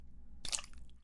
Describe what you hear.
agua, gotas, hojas